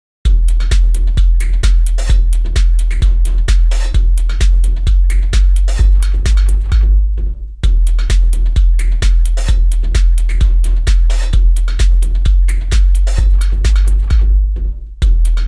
drum rhythm loop
drum, rhythm, hop, kick, n, bass, trip